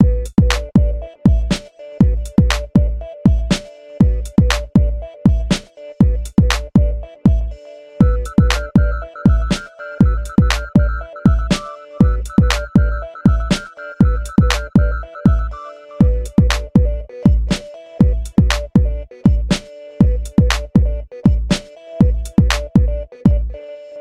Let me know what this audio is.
This is a loopable sample that contains both rhythmic and a melody components. It is even possible to cut out some sub-loops from it, as there are distinct melody phrases.Made in Reason.
120bpm, drum, loop, loopable, melody, music, nice, rhythm, rhythmic